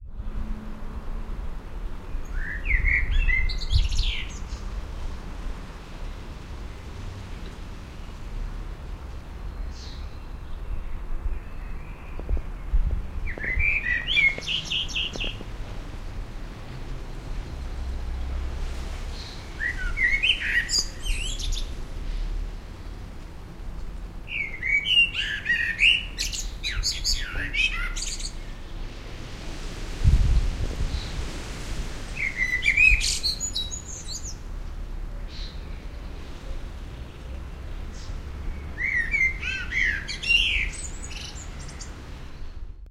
Binaural recording of bird song in an English garden. Homemade Panasonic mic to minidisc.